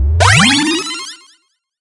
POWERUP!
This sound can for example be used in games, for example when the player picks up a reward or a powerup - you name it!
If you enjoyed the sound, please STAR, COMMENT, SPREAD THE WORD!🗣 It really helps!
1up, adventure, extra, extra-life, game, heal, pick, pick-up, pickup, platformer, Power, powerup, rpg, up, xtra, xtra-life